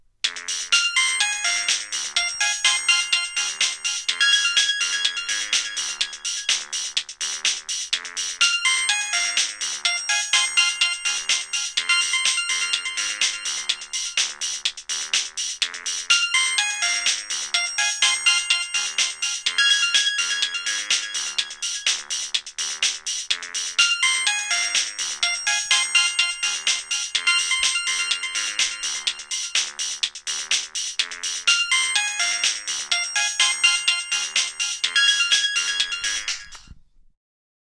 mobile phone ringtone
I very annoying ringtone I recorded for a stage play.